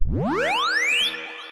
A metal filtered build